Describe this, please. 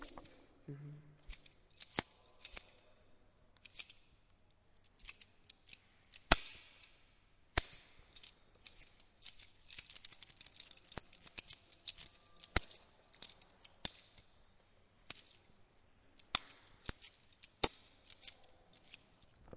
This is a recording of the sound of shaking keys.
bright, key, metal, percussion, ring, SonicEnsemble, UPF-CS12